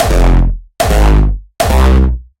Another kick I found from my project backup files. 2013-2014 Stuff.
Created by me from a default drumazon 909 sample, used a wide variety of effects from both FL Studio and Apple Logic Studio.
hardstyle, kick